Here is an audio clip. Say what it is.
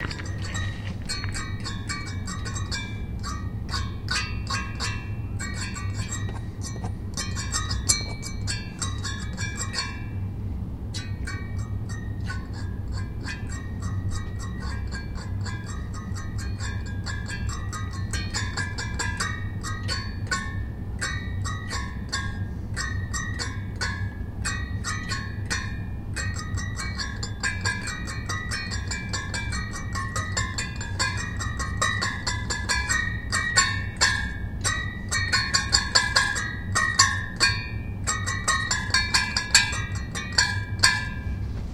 slinky sounds
The sounds were created by dragging a fork against the inner metal workings of an opened computer.
metal, slinky